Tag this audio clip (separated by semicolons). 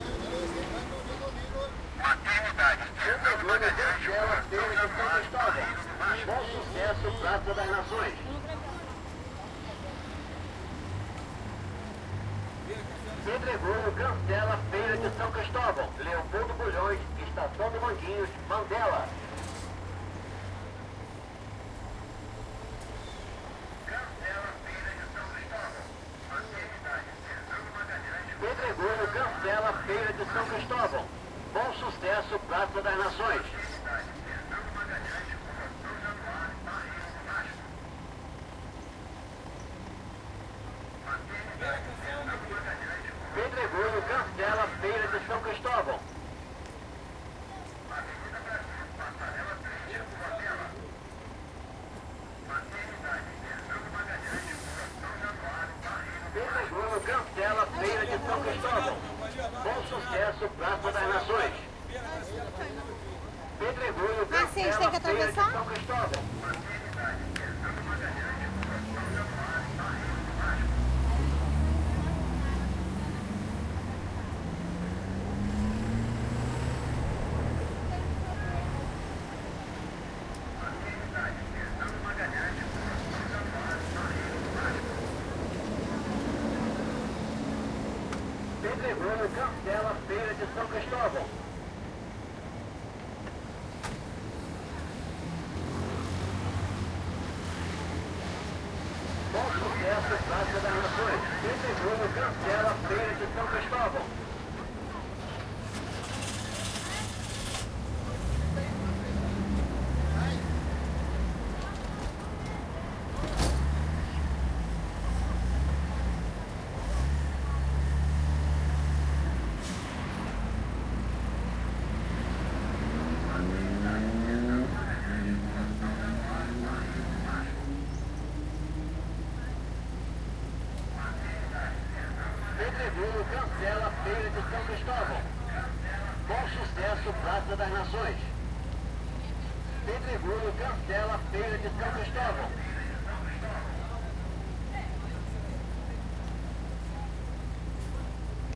town; machines; city; ambiance; zoom-h1; rio-de-janeiro; field-recording; atmosphere; noise; ambient; ambience; people; street; soundscape; dialog; traffic; brazil; announcements; subway